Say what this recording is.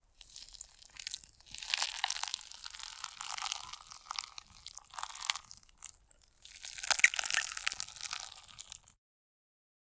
Squish from a pot of overcooked rice and a spoon.
Gross, Foley